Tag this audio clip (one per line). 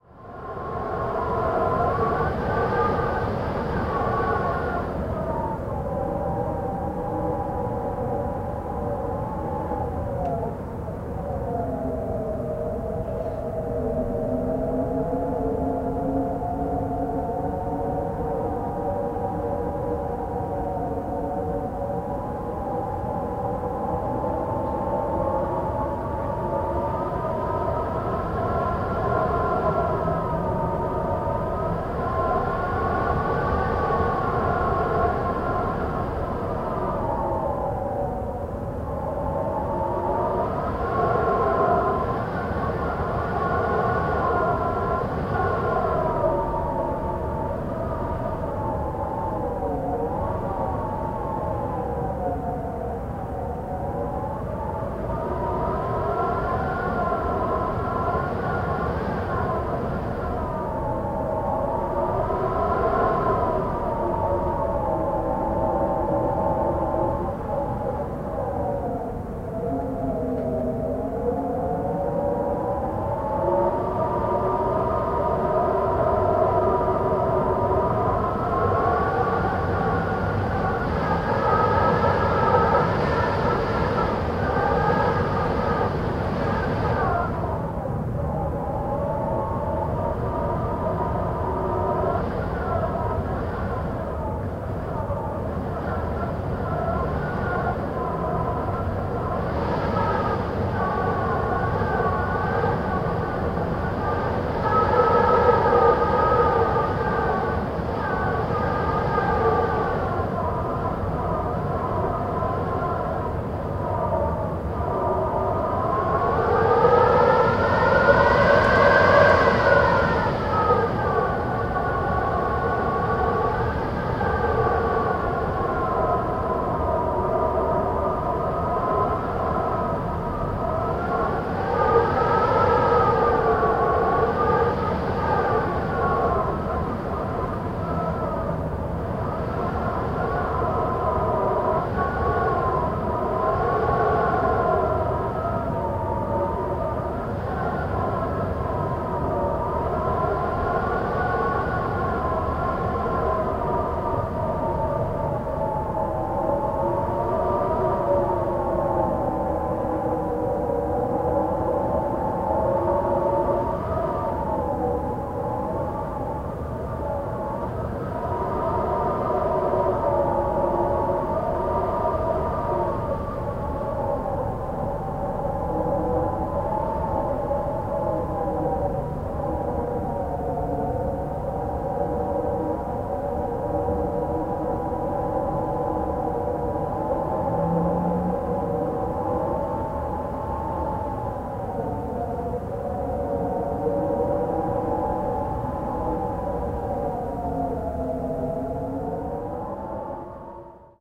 weather
field-recording
whistle
wind
interior